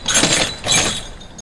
mono field recording made using a homemade mic
in a machine shop, sounds like filename--wrenches as claves